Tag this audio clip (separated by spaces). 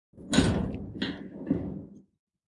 rumble lock hit factory scrape steel pipe rod ting impact metallic industry shiny bell clang blacksmith percussion iron hammer industrial metal shield nails